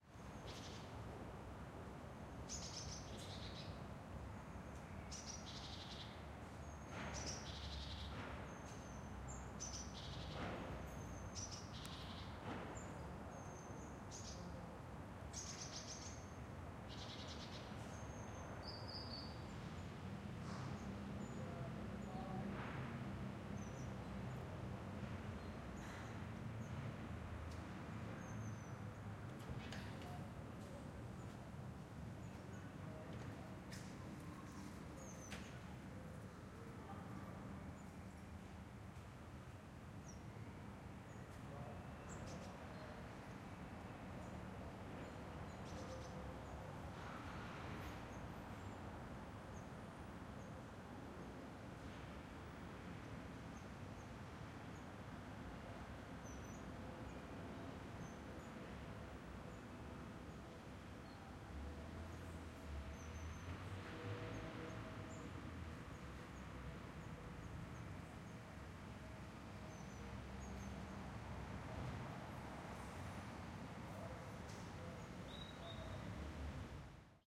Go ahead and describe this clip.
Short ambience of a semi quiet side street in Hamburg Sternschanze. Birds, general traffic noise, wind, some light construction.
Recorded with a baffled pair of MKE2 on a Tascam HD-P2.